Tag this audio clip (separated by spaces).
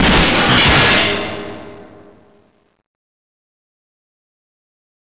breaks 185